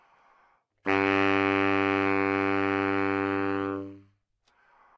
Sax Baritone - G3
Part of the Good-sounds dataset of monophonic instrumental sounds.
instrument::sax_baritone
note::G
octave::3
midi note::43
good-sounds-id::5534
baritone, G3, good-sounds, multisample, neumann-U87, sax, single-note